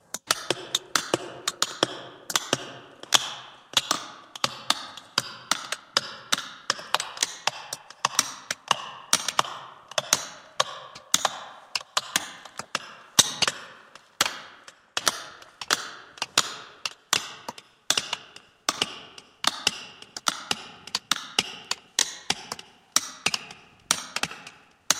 Mining with Pick Axe in a group
The sound has been taken from a man mining a rock. I then reproduced the same sound another couple of times, added effects to it and the result is like a group of people mining.
pickaxe, mine, mining